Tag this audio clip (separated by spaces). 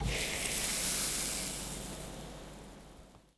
ashes falling grains grainy rice sound-design sugar trickle trickling